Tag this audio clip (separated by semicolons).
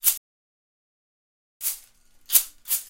hits; percussion; world